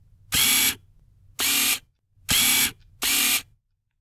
Recording of a DSLR Camera using auto focus several times. The camera used was Canon EOS Rebel T5 DSLR and was recorded in a lab learning audio booth using Blue Microphones Yeti USB mic. Edits made were removing background noise and amplifying the audio.